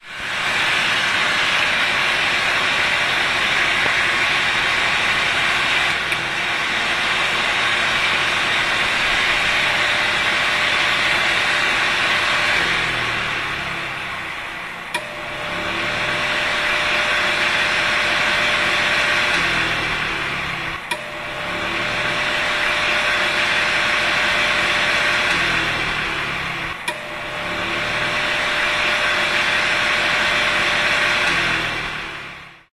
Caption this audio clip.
hand dryer 151110
15.11.2010: about 14.00. the Collegium Historicum building (groundfloor). inside the toilet. the sound of hands dryer.
Sw. Marcin street in the center of Poznan.
noise, machine, field-recording, poland, dryer, toilet, university, collegium-historicum, poznan